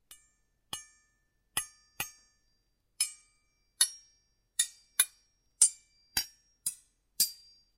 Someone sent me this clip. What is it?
metal-multi-hits
metal metali metallic percussion hit impact
impact, percussion, metallic, metal, metali, hit